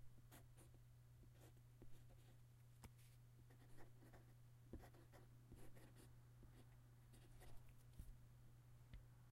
WRITING PEN 1-2
Pen writing on paper
paper, Pen, writing